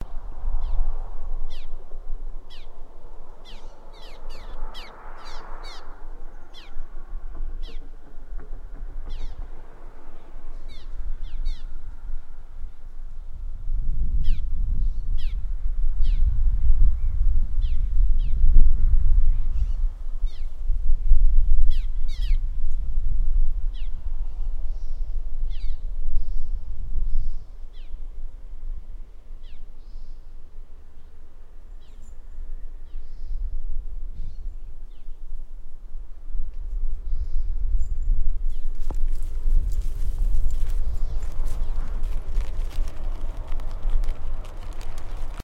I recorded swallows flying over a creek. You can hear the occasional car pass in the background and a little bit of wind rustling the microphone
nature, wind, summer, field-recording, birds, california, ambiance, swallows